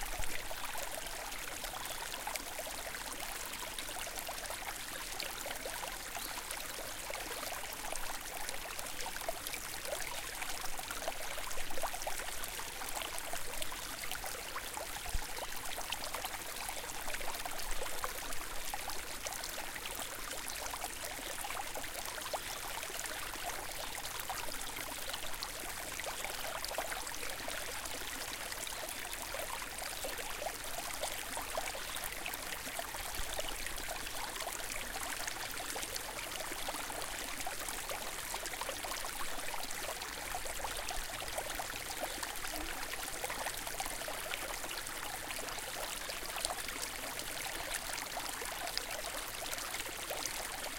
A small stream of water, recorded at Mont-Bélair, Quebec, Canada // recorded with zoom H4N